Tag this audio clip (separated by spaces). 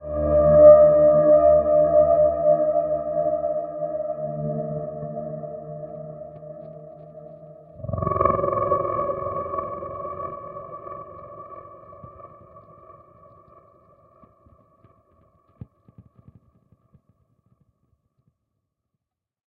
sweetener sci-fi trippy dilation effect sfx time spacey experimental high-pitched sound